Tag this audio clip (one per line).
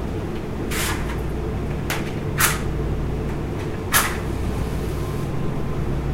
match
matches
fire